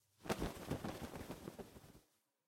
Various bird flapping